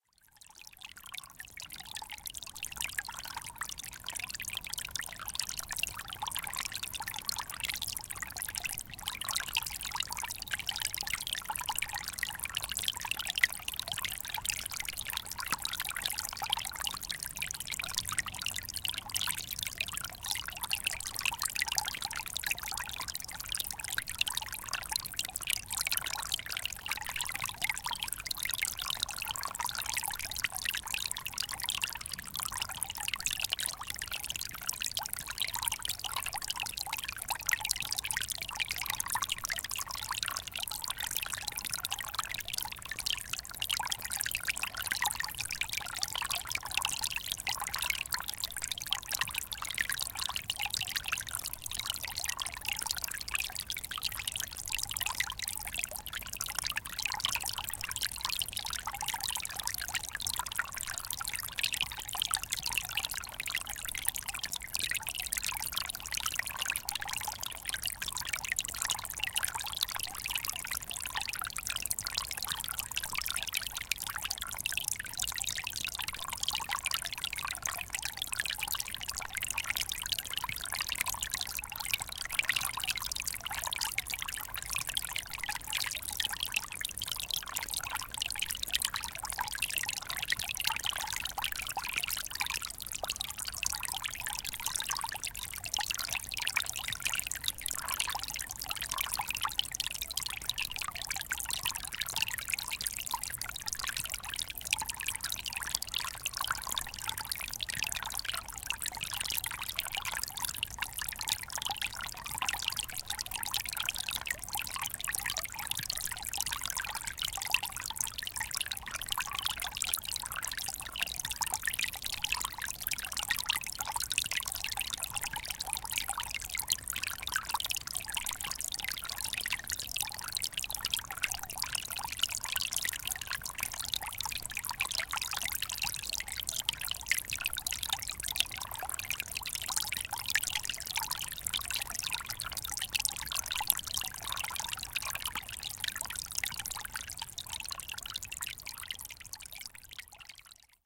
Enjoy this wonderful, clear winter creek....recorded deep in the woods on a cold December day.
ambience, cool, field-recordings, forest, nature, water